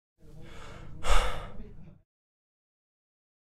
This is a human sigh